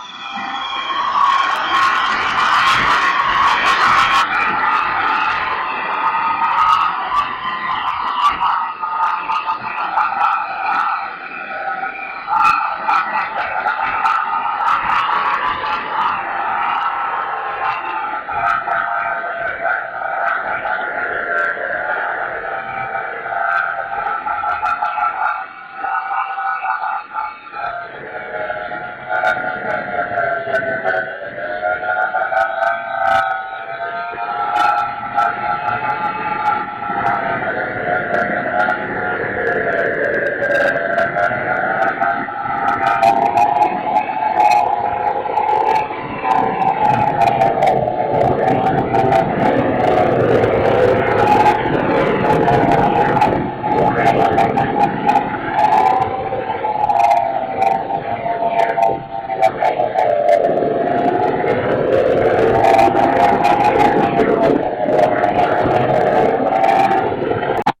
jet fighter
alien jetThese Sounds were made by chaining a large number of plugins into a feedback loop between Brams laptop and mine. The sounds you hear
are produced entirely by the plugins inside the loop with no original sound sources involved.
alien, electronic, experimental, generative, jet, processed, sci-fi, sound-effect